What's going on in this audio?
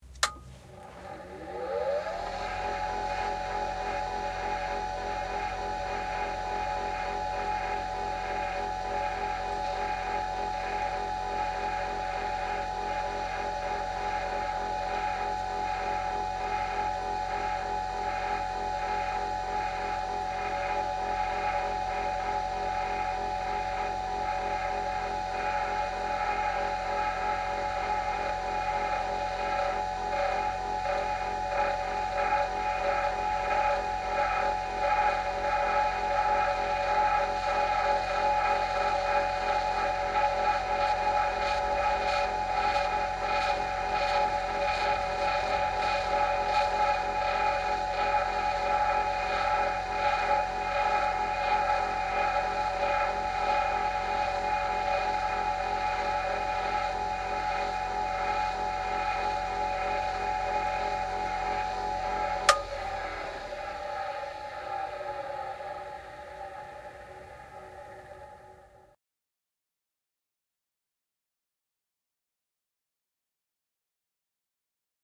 bench grinder draper gm125 v2
Recorded my Dad's bench grinder - idling rather than grinding. the click at the start and end is the power switch. Recorded on ipod touch 3g with blue mikey microphone and FiRe app.
bench, grinder, sound-museum